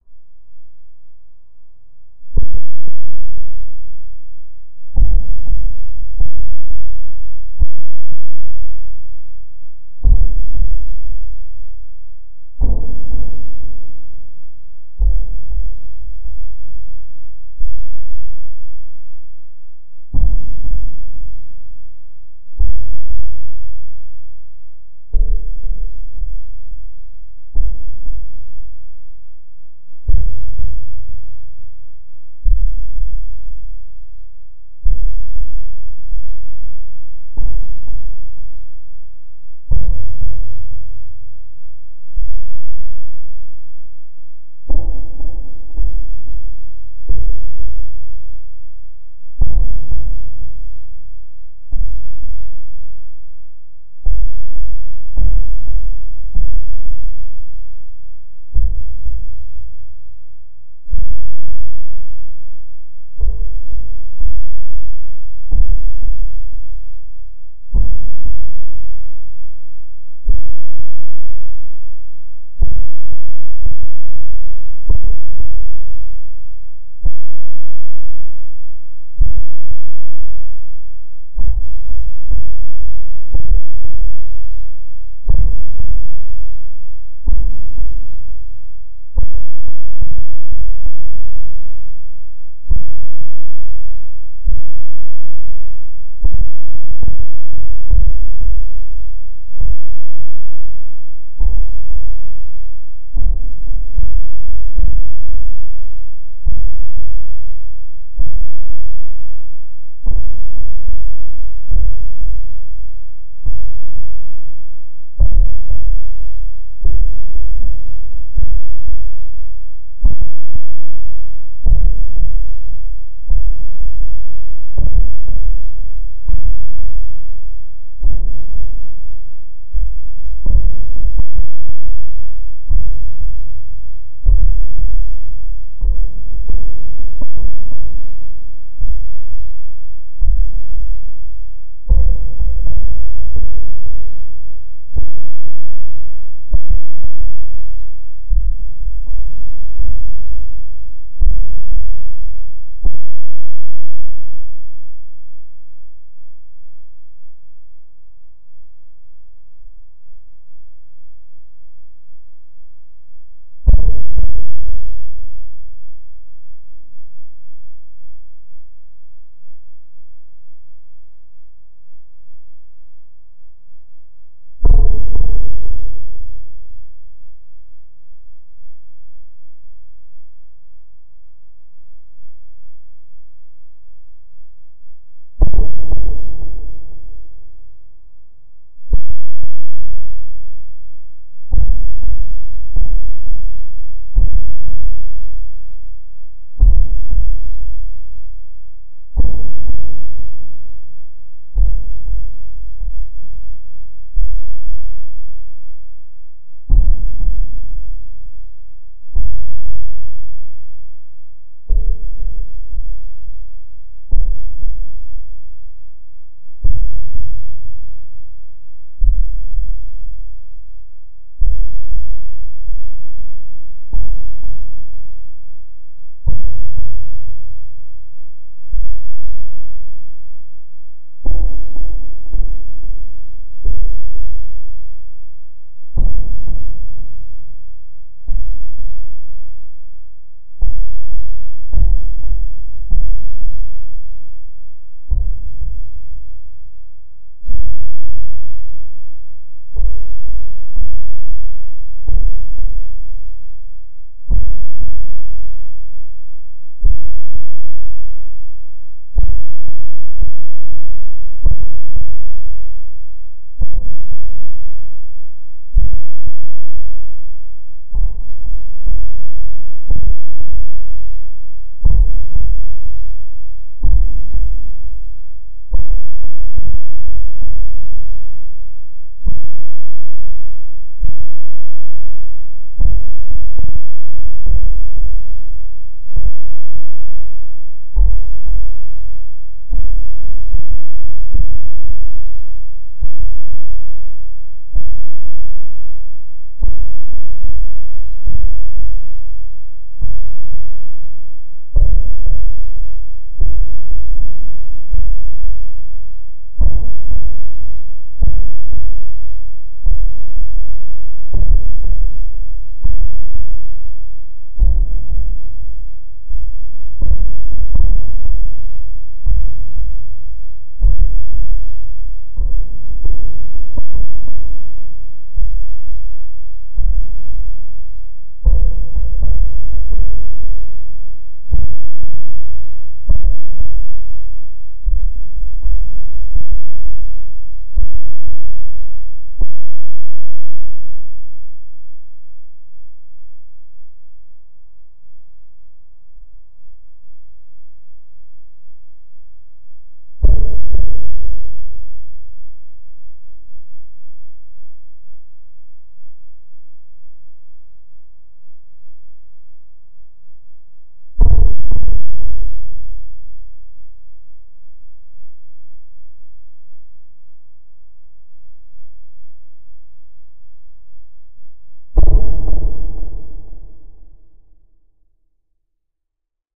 A rather rhythmic series of suspense drums.